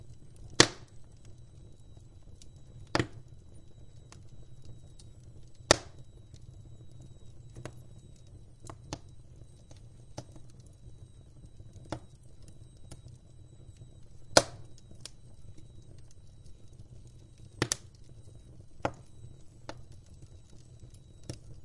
Burning wood 3
The oak wood burning in my old tiled stove.
Recorded on my Tascam DR-100 with uni microphones.
burning, DR-100, field-recording, sound, TASCAM, fire